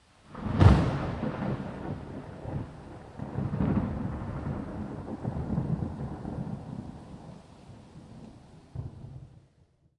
NYC Rain 4 G- lightening crack
NYC Rain Storm; Rain on street, plants, exterior home. Distant Perspective.
Weather, Storm, Lightning, Thunder, NYC, Rain